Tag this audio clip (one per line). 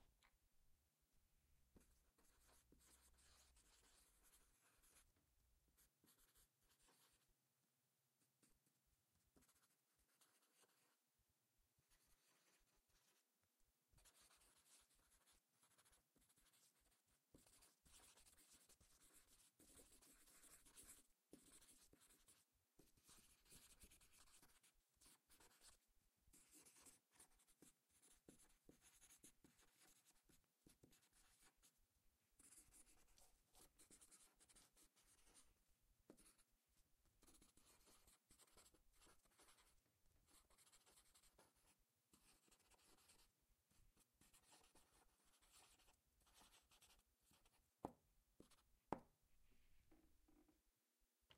pen
pencil
scribbling
writing